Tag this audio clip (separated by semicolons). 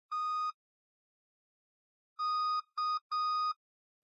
alert; beep; cell; intercom; phone; ring; technica; telephone; tone